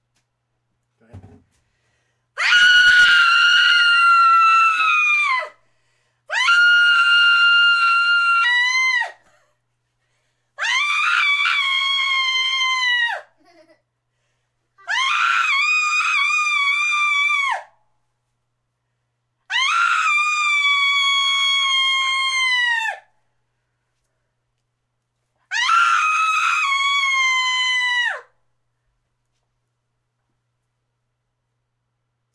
My wife screaming into my BeyerDynamic MC837 into ProTools
woman, screams, giggle